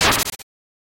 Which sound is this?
Slash - [Rpg] 2
attack, ct, cut, efx, free, fx, game-sfx, rpg, sfx, slash, slice, sound-design, sound-effect, sword